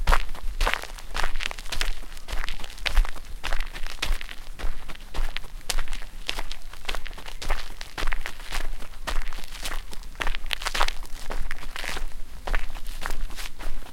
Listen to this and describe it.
Footsteps on gravel
Someone walking on gravel. Recorded with Zoom H1